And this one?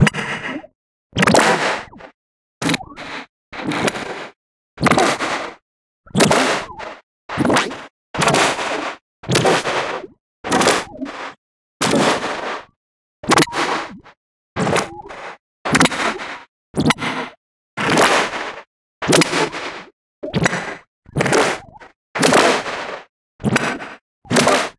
starObject Snats
Careless asteroid whispers in the dark (of space).
abstract, atonal, effect, experimental, fx, laser, lazer, resonant, sci-fi, sfx, sound-design, sound-effect, space, synth